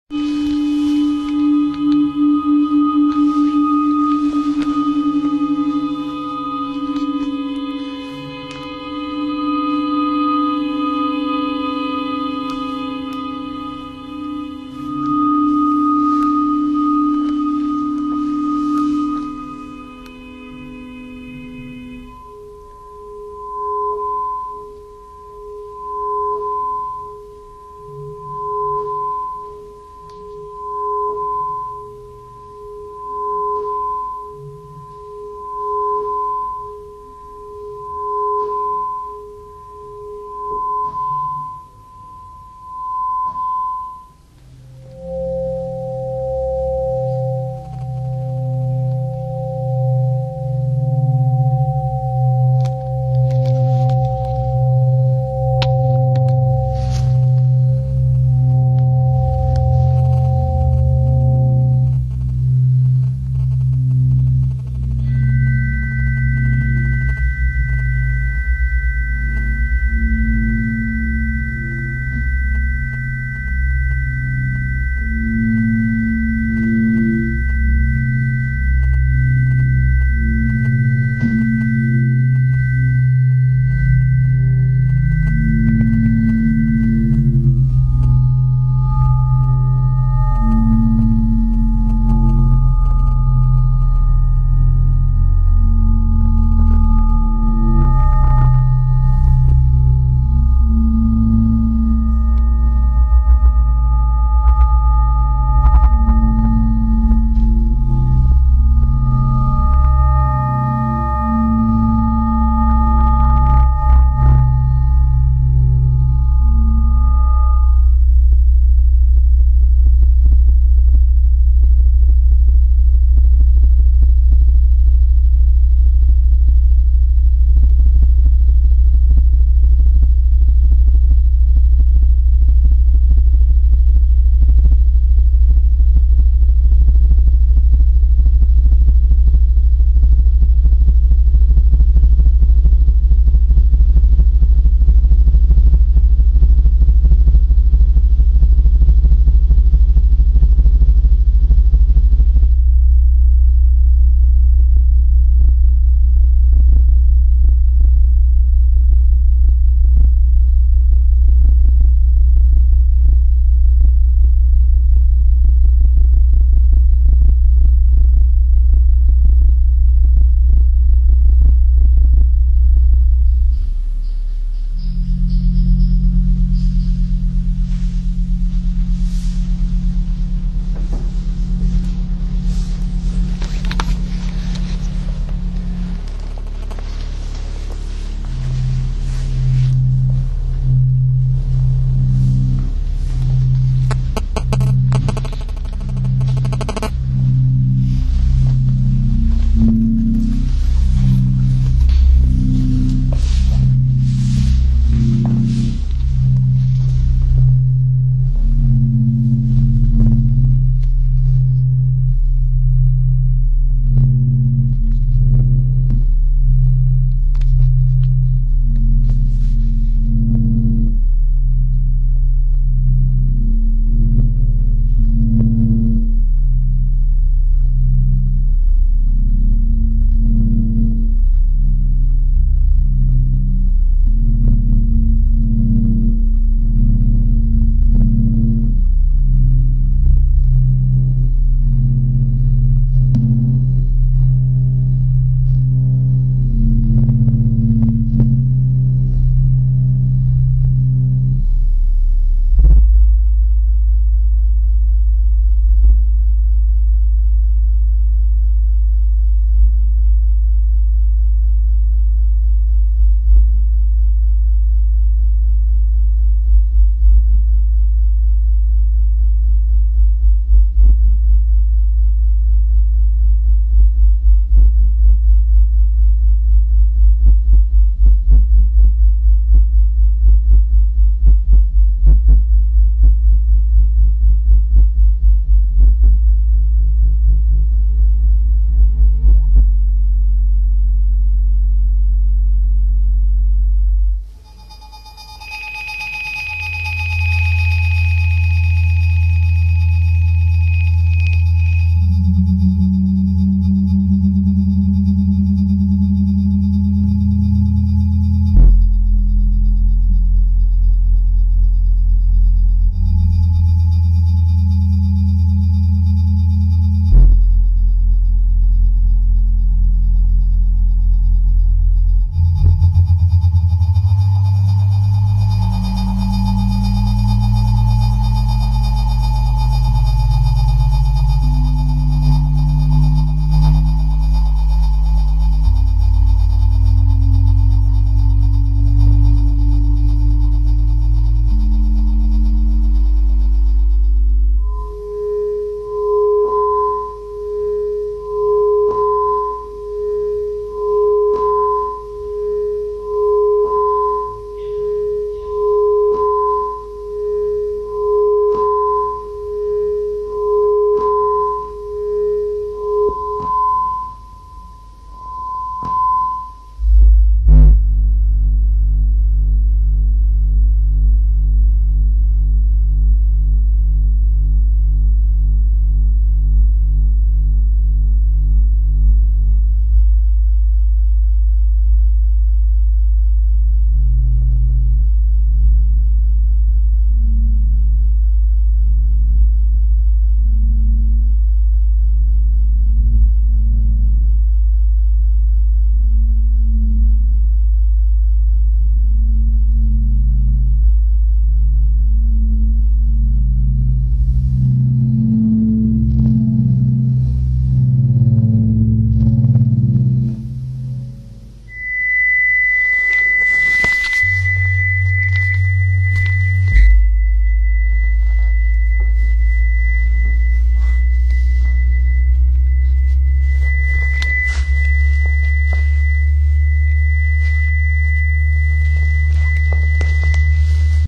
sound chamber pt I
Sound Chamber, Funkhaus Berlin